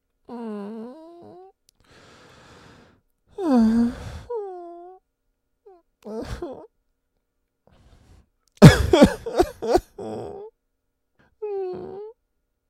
AS028438 grief
voice of user AS028438
affliction, anguish, grief, heartache, heartbreak, human, male, man, sorriness, sorrow, vocal, voice, woe, wordless